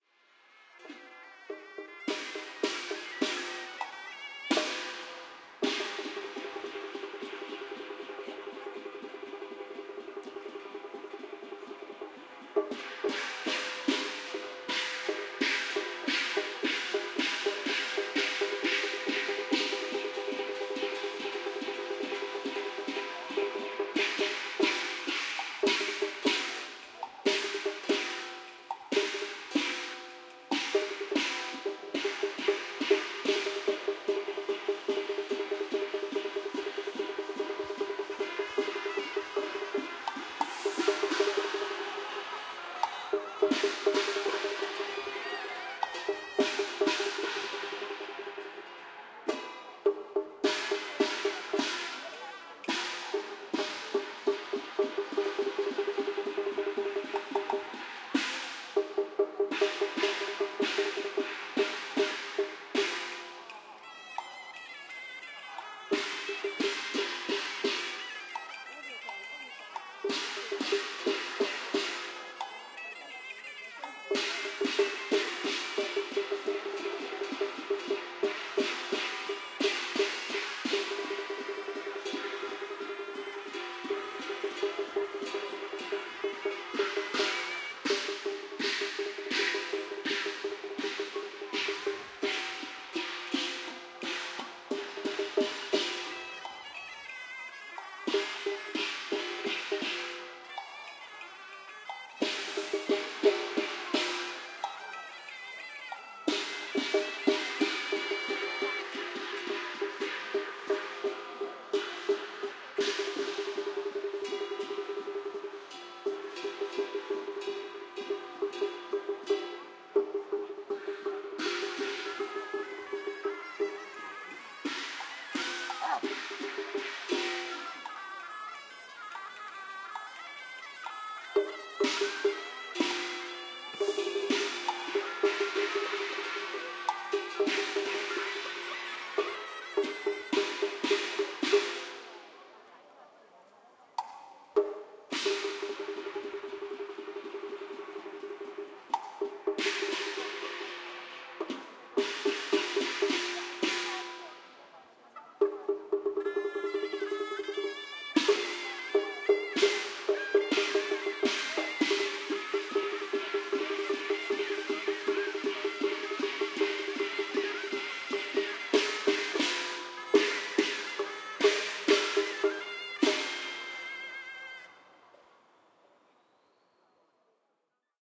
Chinese Traditional Ritual 2
Stereo recording of some ritual music using chinese musical instruments. A traditional Chinese ritual had been performed in the public open area of a housing estate. one of the ritual "performers" blew some alcohol onto the fire in the centre and a big fire blast out. The crowd was amazed. Recorded on an iPod Touch 2nd generation using Retro Recorder with Alesis ProTrack
chinese, hong-kong, ritual, traditional